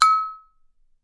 A glass hit with a spoon
glass, kitchenware, percussion